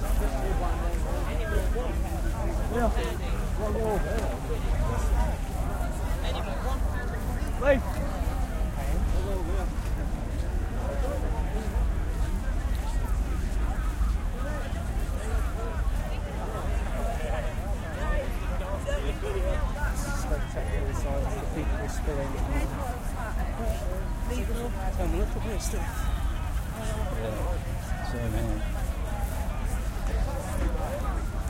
Festival Crowd 01
Festival Crowd LOOP 1/2. Recorded at the O2 Wireless Festival in Leeds
2006. Recorded with the Microtrack 2496 recorder.
audience, concert, festival, gig, crowd, atmosphere